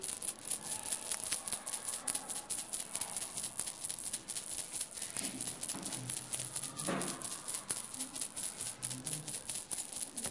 mySound JPPT5 Matilde
Sounds from objects that are beloved to the participant pupils at Colégio João Paulo II school, Braga, Portugal.